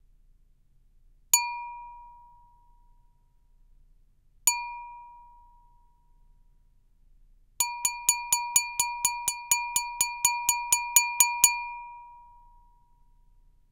200809-WINE GLASS STRIKES 2
-Wine glass strikes
beverage, clank, clanking, clanks, dish, dishes, drink, drinks, glass, strike, strikes, striking, wine